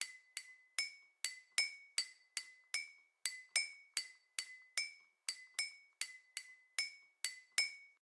Bell 120bpm
This is a Bellish sound I created with two glasses at my home.
westafrica, percussion-loop, rhythm, percs, groovy, Glass, percussion, Bell